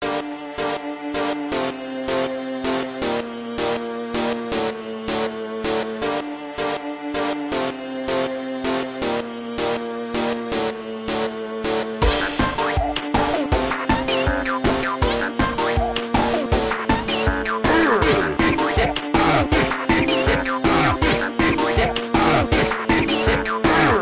Goofy Type Beat
Just a goofy little song